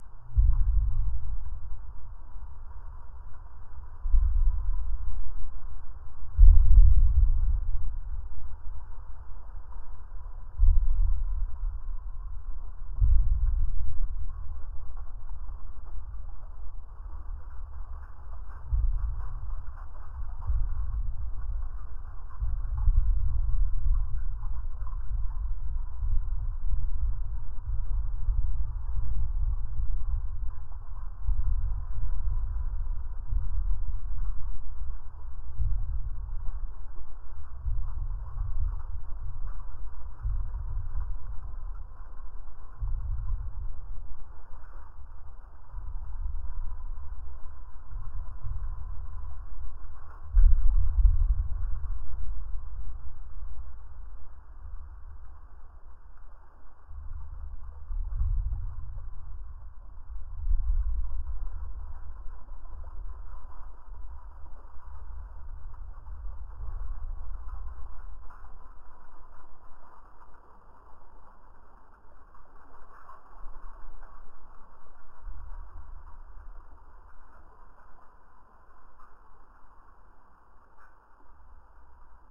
Ambient level / location sound 2
Level sound, ambient.
level, ambiance, experimental, evolving, disgusting, drone, pad, disgust, scary, sinister, location, nature, dying, light, background, stalker, background-sound, fear, haunted, ambient, anxious, horror, atmos, creepy, loading, soundscape